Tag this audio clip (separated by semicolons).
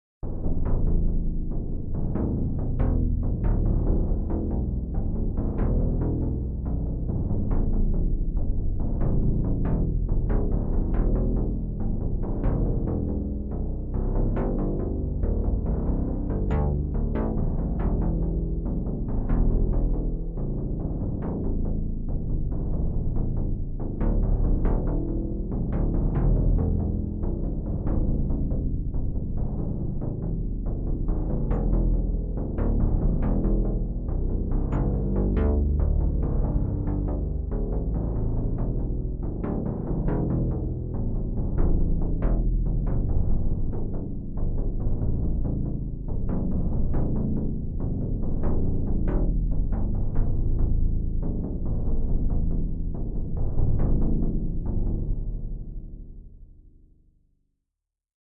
bpm-140; electro; electronika; elektro; loop; modern; music; new